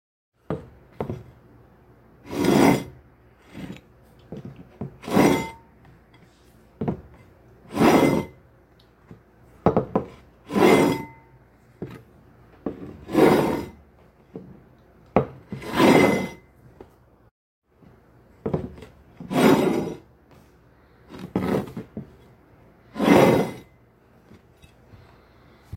Multiple sounds of a plate quickly sliding through a table
multiple, plate, sliding-plate